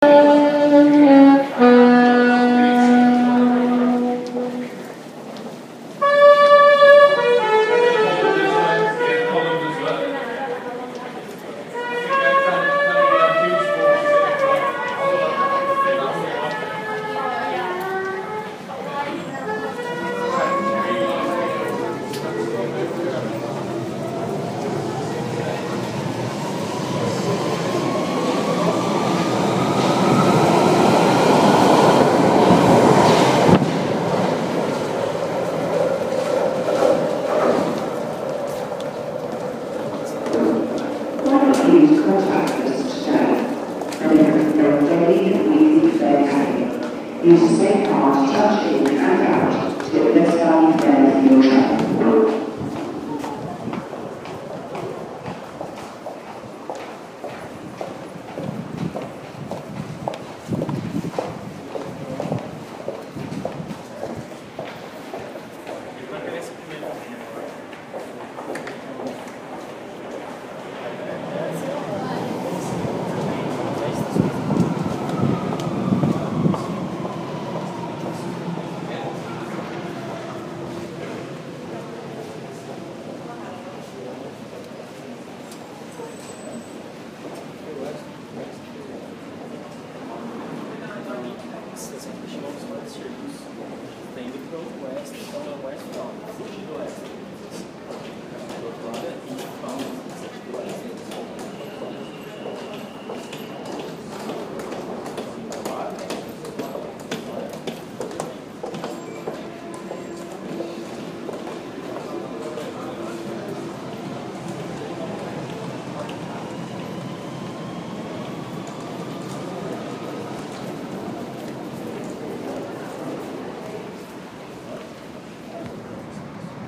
A recent visit to London and I recorded these sounds with my iphone4 for a project, they aren't the highest of quality (limited by the mic quality on the phone) but they give a good indication and could probably benefit from some EQ to make it sound better.
I passed a busker with a saxophone.
Lots of footsteps